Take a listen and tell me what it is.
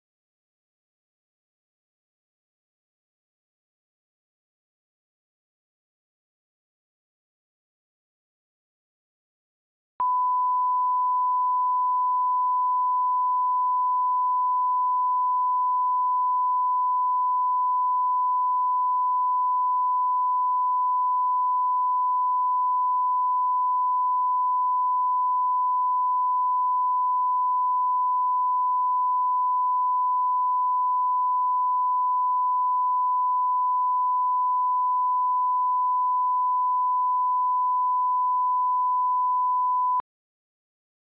A test tone that can be used for final mix for tv and other similar media. It contains 10 seconds of silence and then 30 seconds of a 1kHz test tone (sine wave) with the digital level set at -20dBFS.